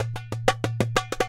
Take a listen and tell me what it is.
188-darbuka-doumbek groove loop 188 bpm
188 bpm 4/4 darbuka/doumbek loop recorded in stereo at my home studio with a Behringer B1 and a Shure SM57. Have fun with it.
188-bpm, acoustic, beat, darbuka, doumbek, drum, drum-loop, drums, groove, hand-percussion, loop, percussion, percussion-loop, rhythm